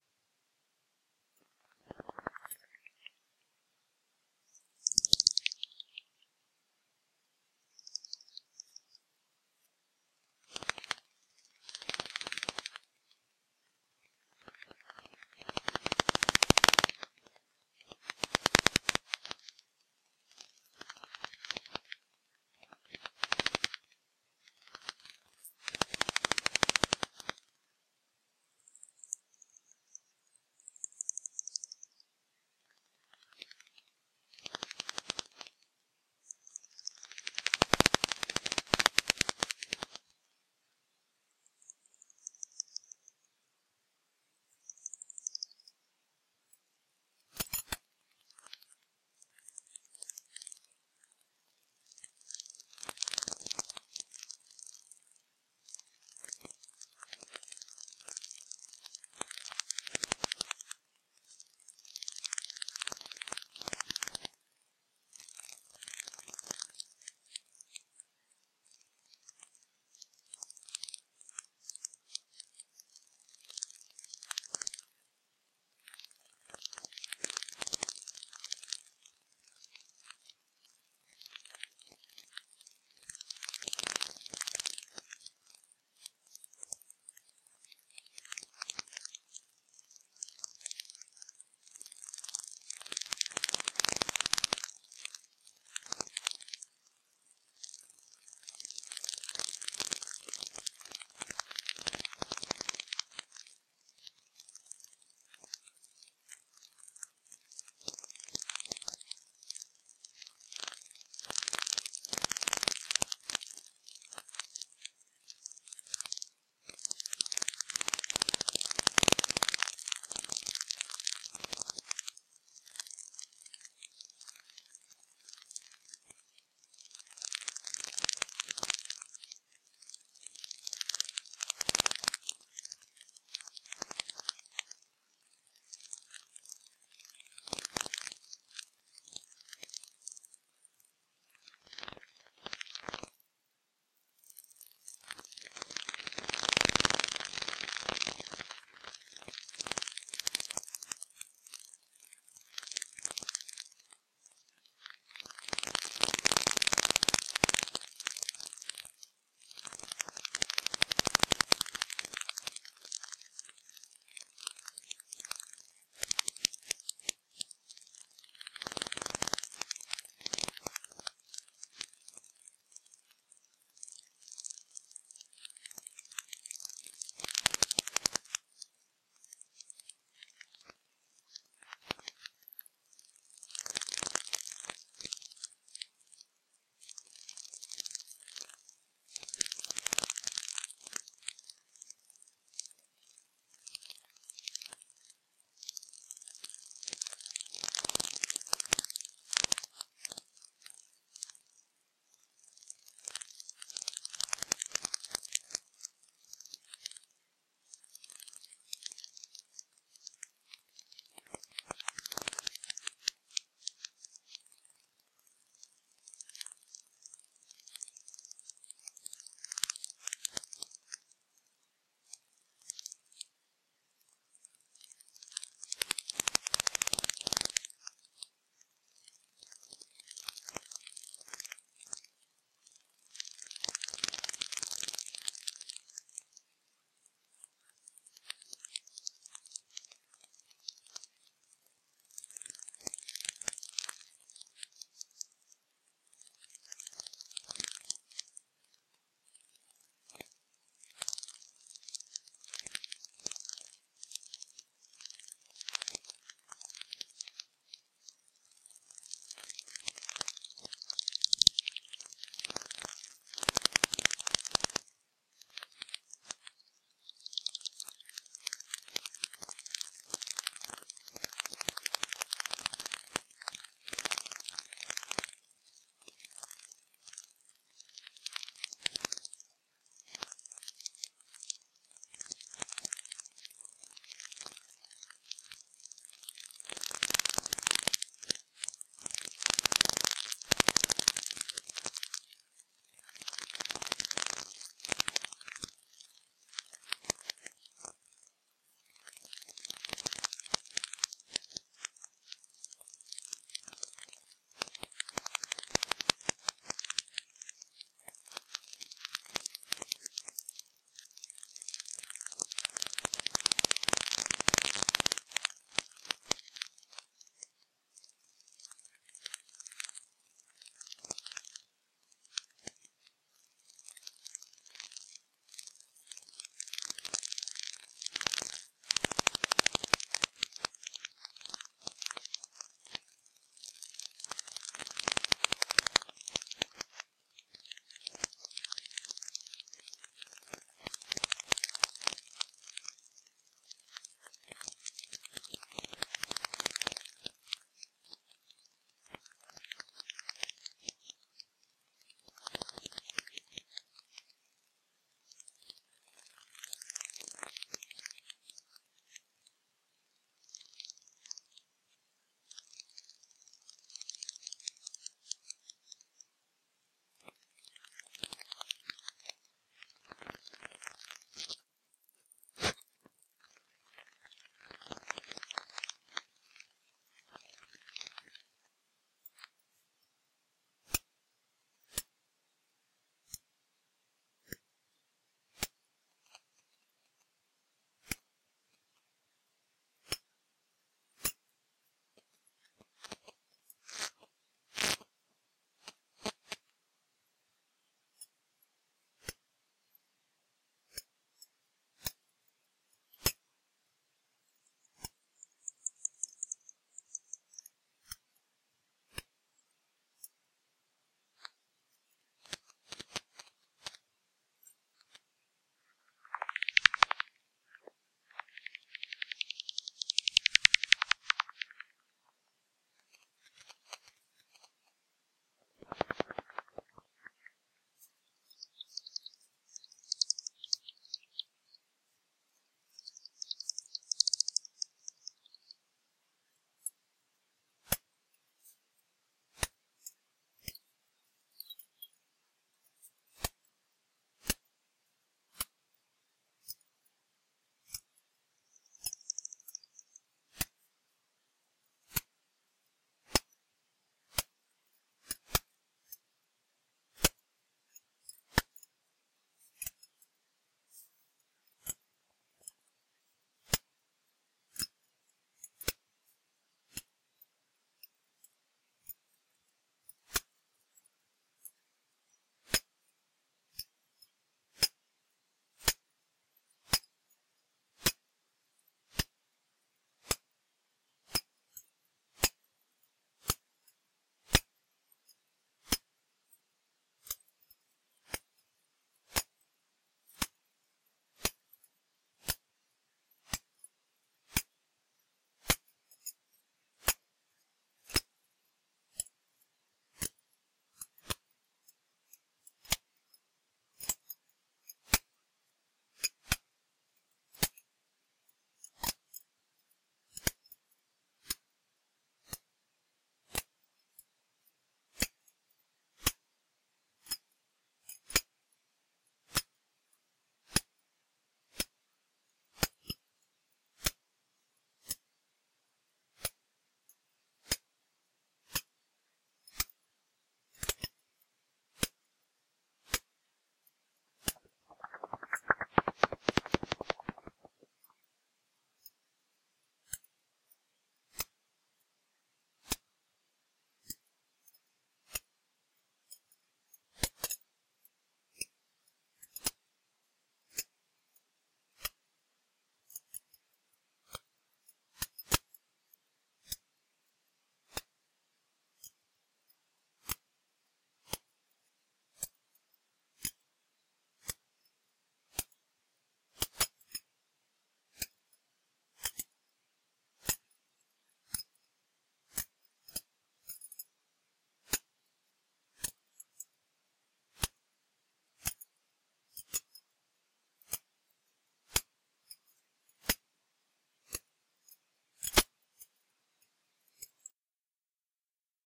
Bats at Hampstead Ponds
Bats, location-recording, Nature